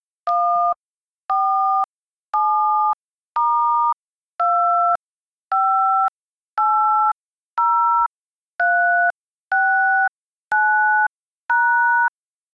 Cell phone buttons
12 Cell-phone buttons
Recorded with Samson Meteor